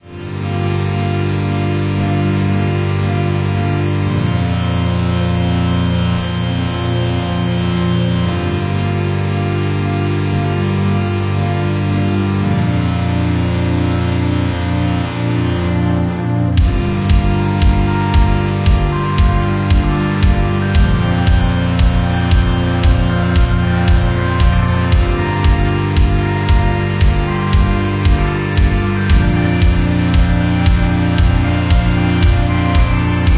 Atmospheric synth piece with a minimal beat.